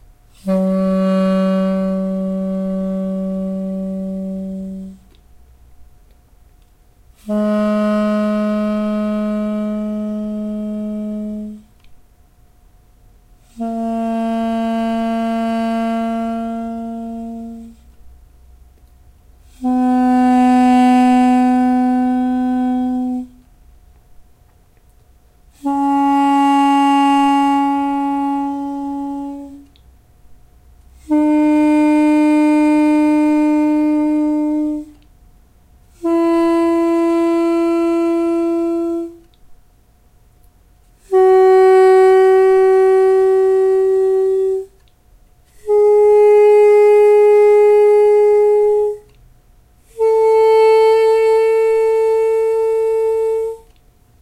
Scale by Duduk - Armenian double-reed wind instrument
Recorder: Zoom H4n Sp Digital Handy Recorder
Studio NICS - UNICAMP
armenia, duduk, escala